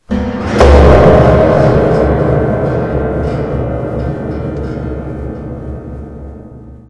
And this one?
Piano falling down stairs
This was recorded from an old piano getting beat up.